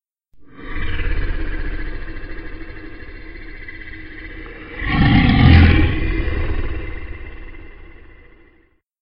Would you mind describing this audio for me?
Beast Roar lvl3
Monster, beast, demon, growl, lion, roar, voice